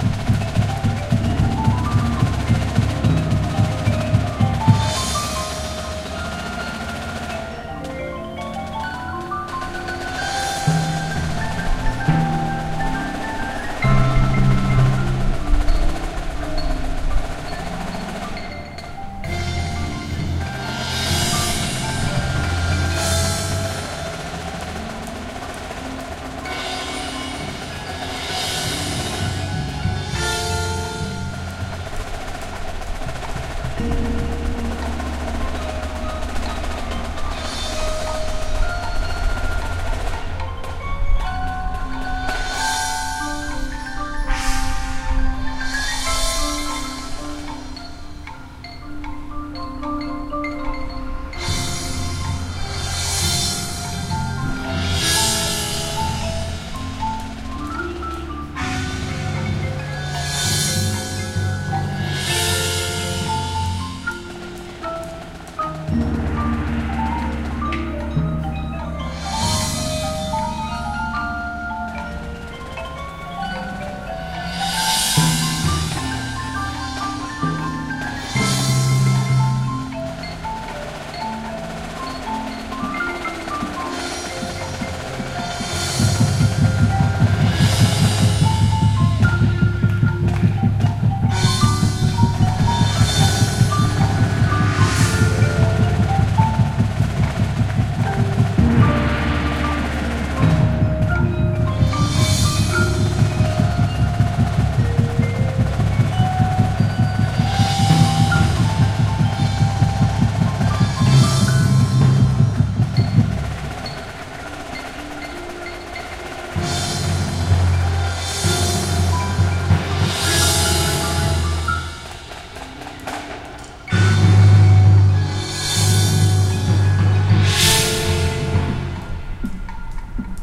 Xylophones, Bass Drums, and Snare Drums Ambience
Xylophones, bass drums, and snare drums practicing.